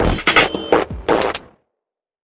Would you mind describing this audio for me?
Another 166BPM break/loop, I added some distortion and sounds a bit glitchy with the high frecuencies.